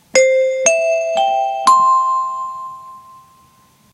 Tannoy chime 05
An imitation of a chime you might hear before an announcement is made.